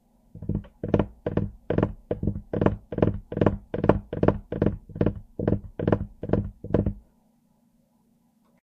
daxtyla se ksylo
fingers tapping wood
fingers, tapping, wood